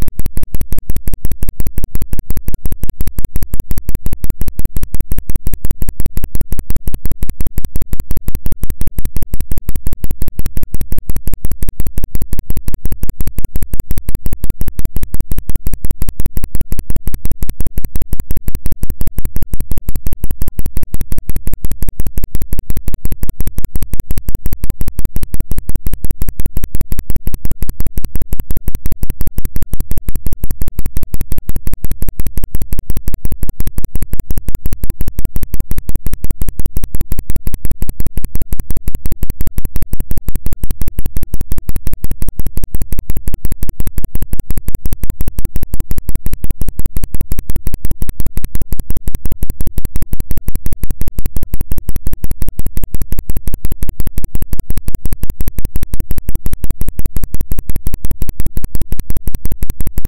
Click Dance 33
So when I launched Audacity within Gentoo Linux, a strange ground loop occurred. However, adjusting the volume settings within alsamixer caused the ground loop to become different per volume settings. The higher the volume, the less noise is produced; the lower the volume, the more noise is produced.
Have fun, y'all!
electronic, freaky, future, lo-fi, sound